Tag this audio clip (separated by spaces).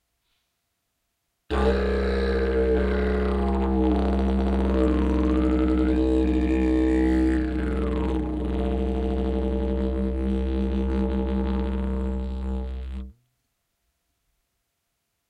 drone natural scream gutteral filler didgeridoo didgeridu